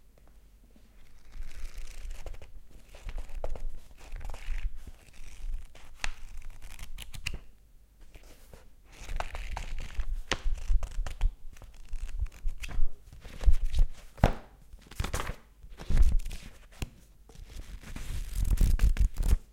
soft sound produced by book pages moving fast. recorded with Rode NT4 mic->Fel preamplifier->IRiver IHP120 (line-in) /sonido muy suave producido al mover las páginas de un libro
paper, percussion, book